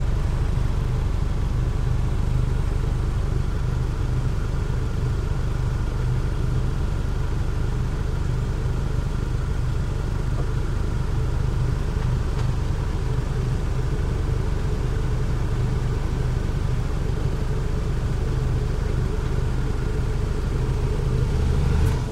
idle mitsubishi lancer exhaust rear side
Mitsubishi Lancer idle in Moscow traffic, exhaust, rear perspective